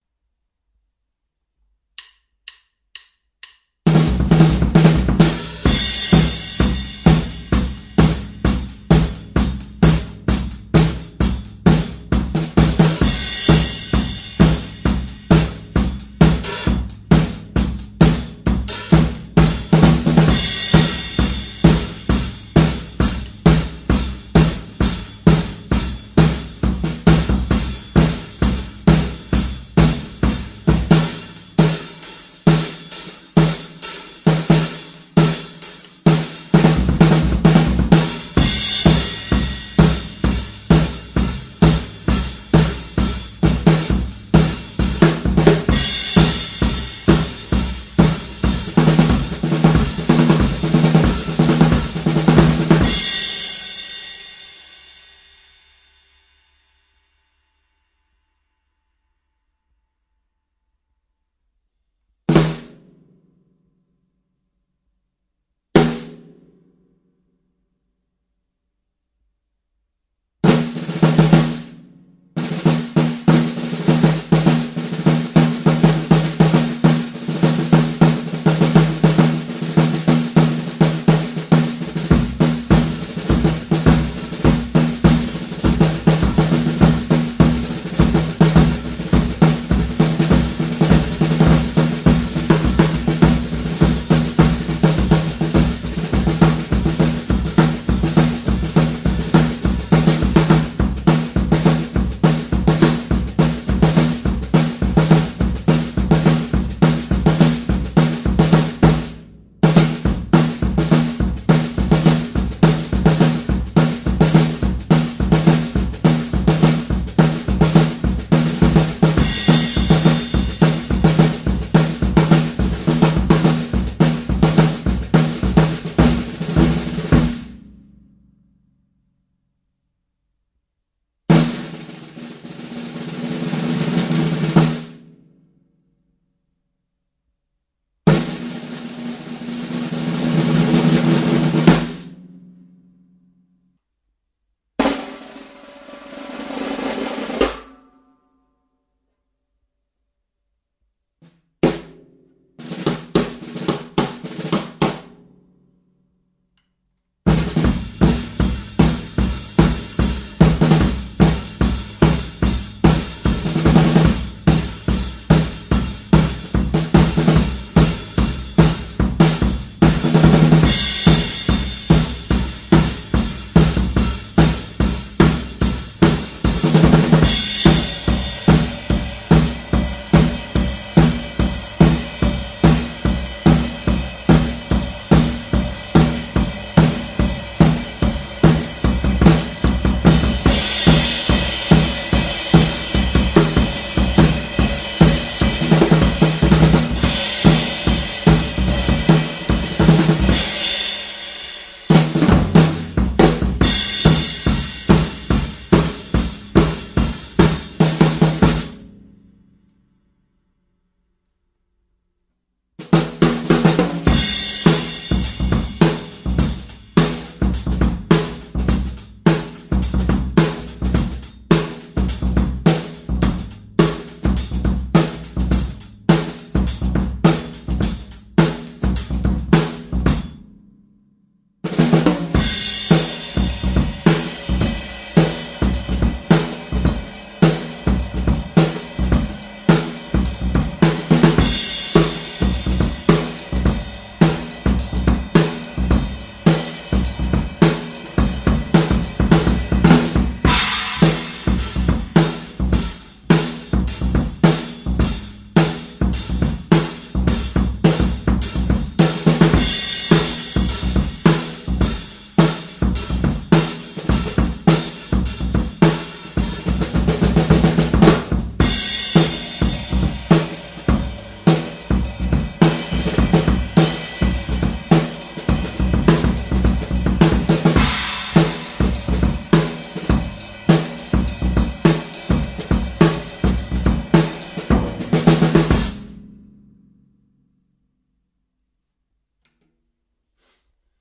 Straight drum beats - Gretsch + Starphonic
Some drum beats I played on my Gretsch Catalina Jazz kit + Tama Starphonic snare drum tuned low.
Some rock, some pop, some marching band stuff, buzz rolls.